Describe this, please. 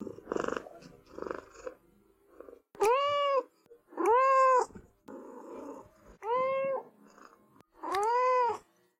All I have to do to get my kitty excited is to scroll through and play the ringtones on my phone. She came running right up to the microphone and made these excited kitty sounds.
cat, kitty, purring, meowing, excited, meow, talking, trilling